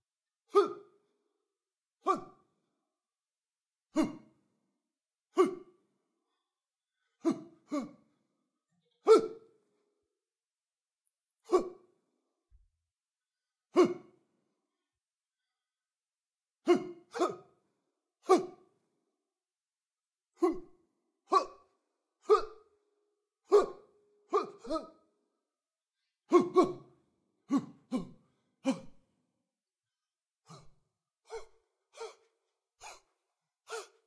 Various vocal grunts of a male fighting, punching or kicking. Could be also used for other sports, or as an effort sound.
Just giving back to the community :)